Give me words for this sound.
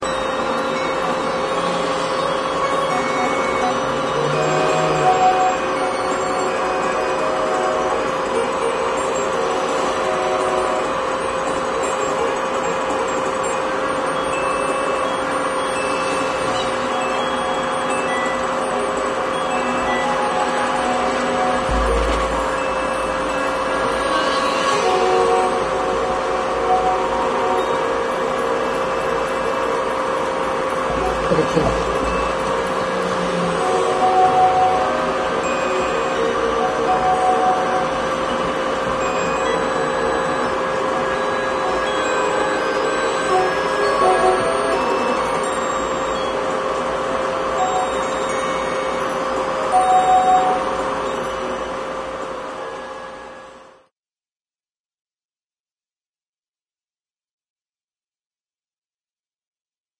cobalt-eel
The sound of a European city like Amsterdam. But it's not a field recording! Made with the strange Critters program. Phasing effect added with Audacity
amsterdam, bells, city, europe, fx, music, street-organ, urban